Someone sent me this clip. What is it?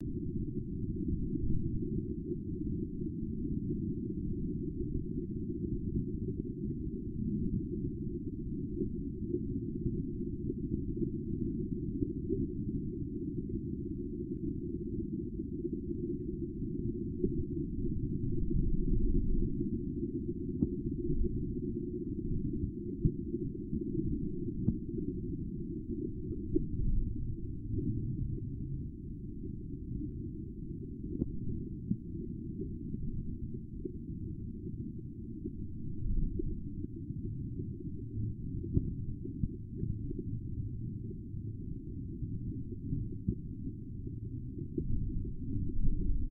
Hydrophone 1 Filtered
This has a typical underwatery sound...because it was recorded underwater :) I have filtered the sound of marine snapping shrimp prominent in the original recording. This contains only frequencies below 320 Hz. Hydrophone was a home-made (but excellent!) see comments.
bubbly, underwater